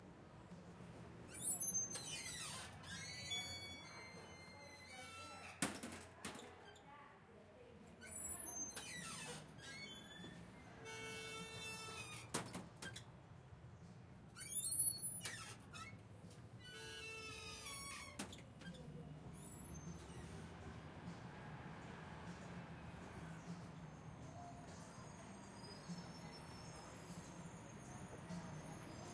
Squeaky exterior door to elevated NYC subway station
A squeaky door leading into the subway platform at the New York City subway Myrtle-Broadway station